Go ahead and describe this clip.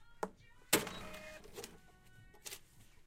Opening of a cash-register